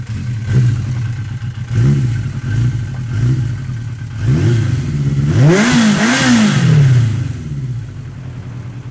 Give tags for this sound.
motorcycle; revving

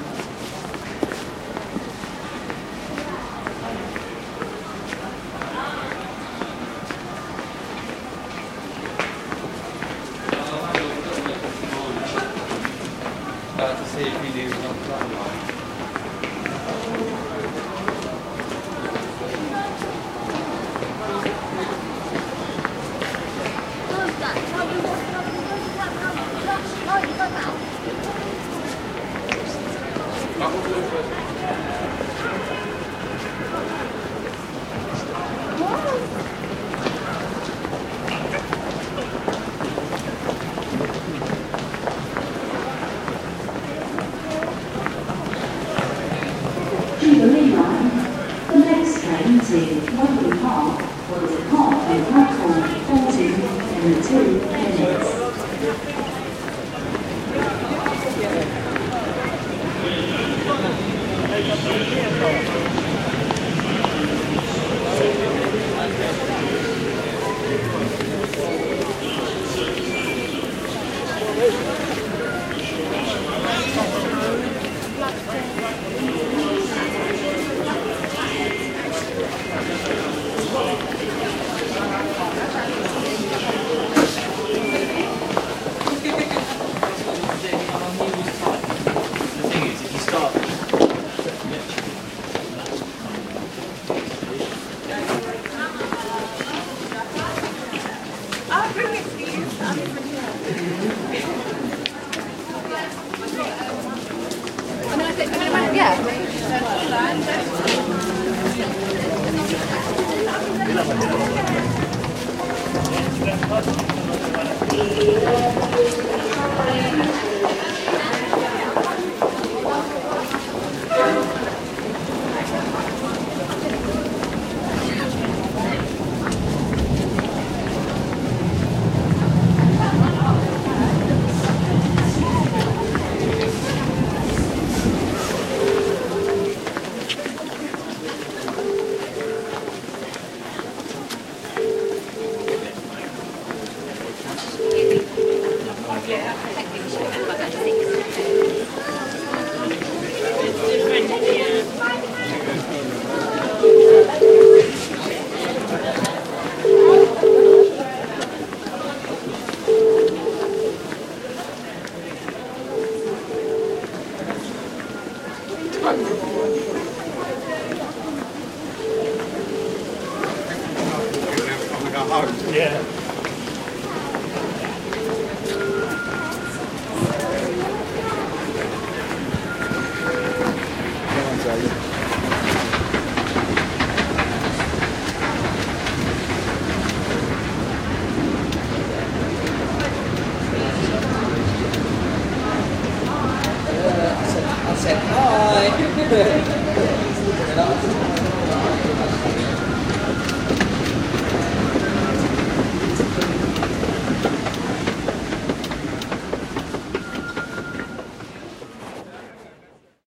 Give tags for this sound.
ambience; ambient; announcement; arrive; depart; escalator; field-recording; footsteps; general-noise; hall; help-point; inside; london; london-underground; metro; outside; people; phone; rail; railway; ringing; station; stratford; subway; talk; telephone; train; trains; tube; voice

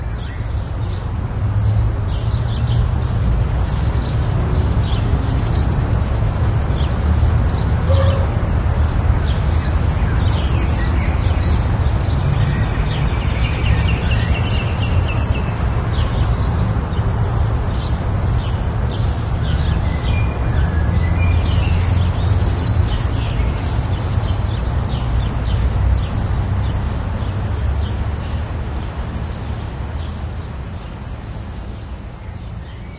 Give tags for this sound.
city; nose; ambient; park; birds

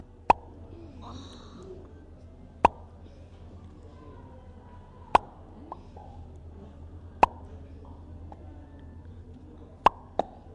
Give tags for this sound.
descorche; efectos; sonoros

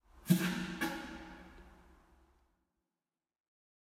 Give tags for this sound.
ambient; beer; disgusting; drunk; fart; halloween; human; male; pub; reverb; vocal; voice

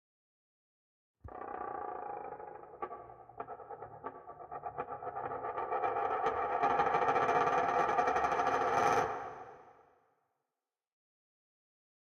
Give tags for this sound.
rise; tension

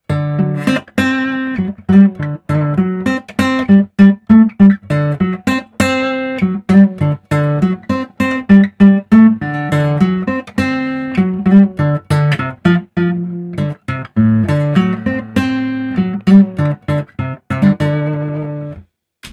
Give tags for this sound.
improvised; guitar; acoustic; loop

